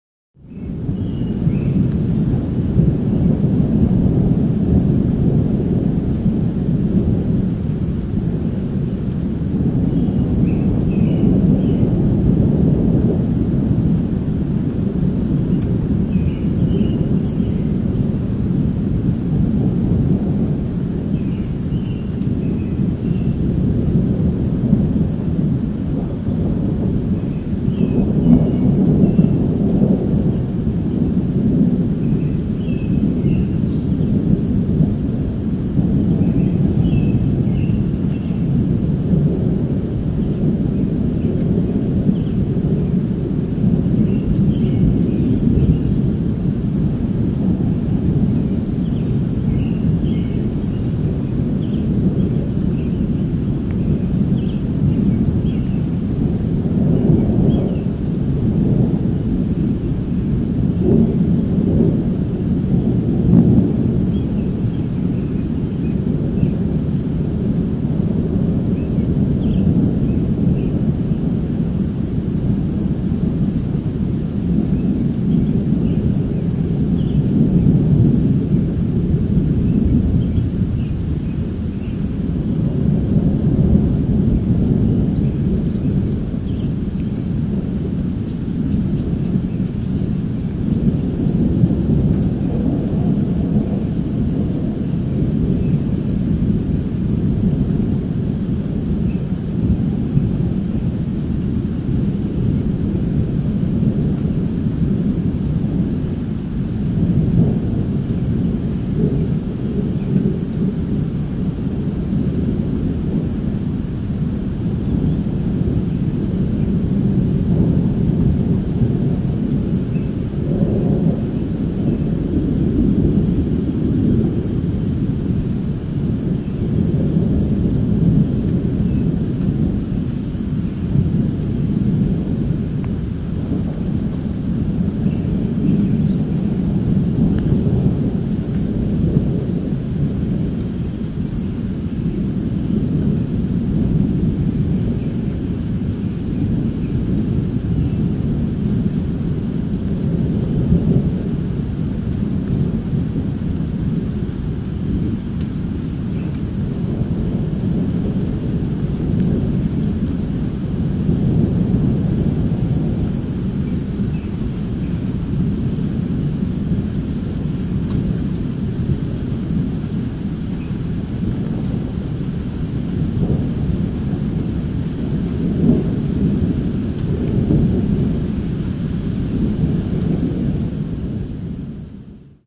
As this thunderstorm approached the Lincoln, Nebraska area the thunder was non-stop, rumbling like an automobile engine. It's a rare sound in these parts.